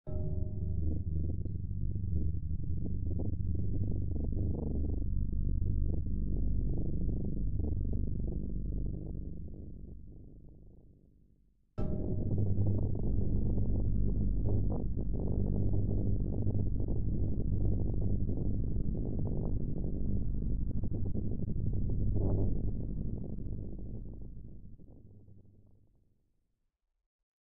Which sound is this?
back to the tasting room
Dark and creepy sound made with Omnisphere 2 and Ableton.